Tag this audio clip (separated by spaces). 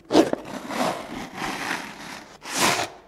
animal beast growl king lion roar